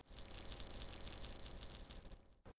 Fast Crawling Bu
It's not the best sound ever (I'm a newbie), but maybe somebody else might have use for it. So 'ere ya go!
bug, crawling, insect